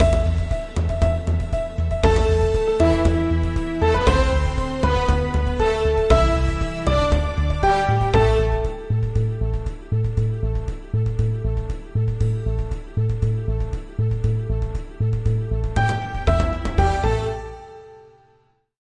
In Other News
This just in: Bees are real!
More in a exclusive report by Deborah.
Although, I'm always interested in hearing new projects using this sample!